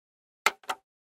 Pressing button plastic one in a studio atmosphere with a Zoom H6.
sound, click, press, plastic, buttons, button, switch, game, push, sfx, button-click